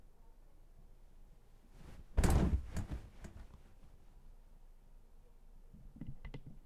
Jumping into Bed -01.R
Jumping into bed again
bed, into, jumping